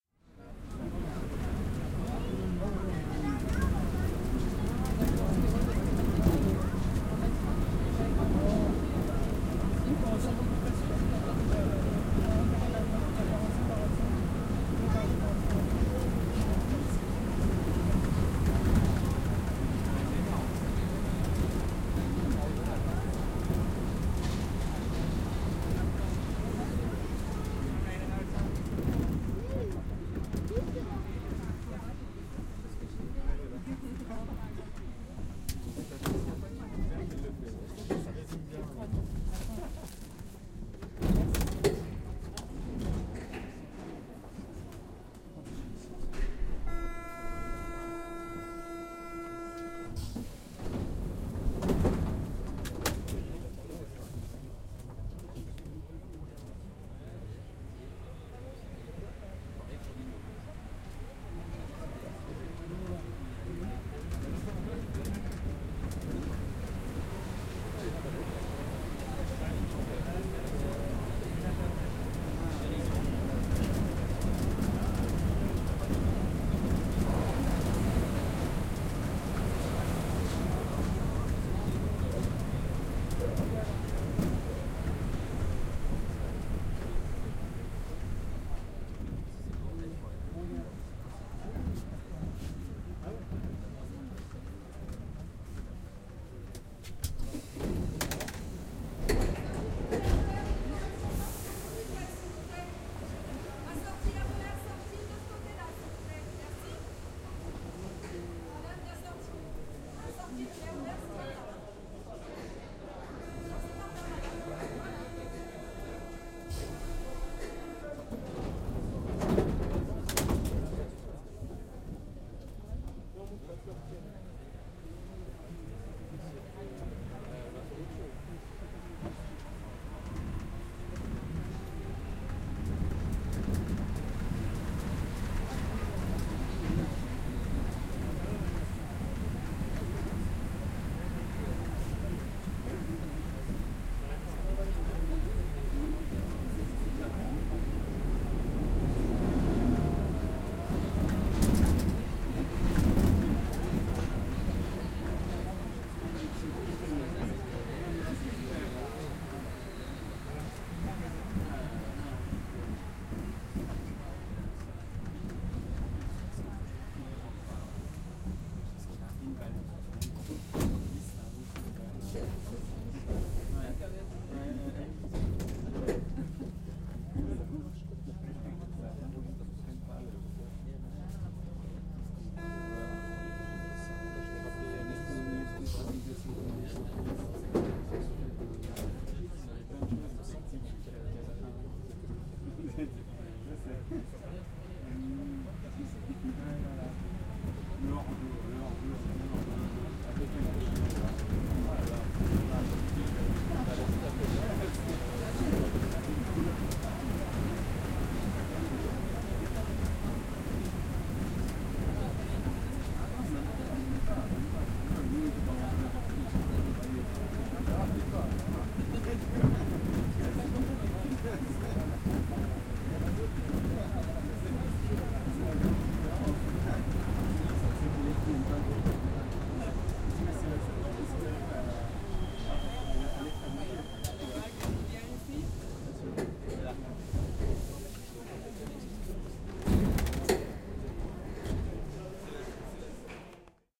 in the Metro ambience
Sample recorded with ZOOM H4 in one the metro line nr13.
france, metro, recording, ambience